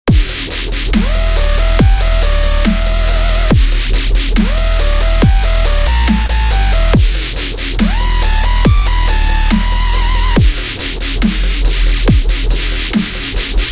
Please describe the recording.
Normie Dubstep
Created with sequenced instruments within Logic Pro X.
bass, Generic, heavy, loop, music, wub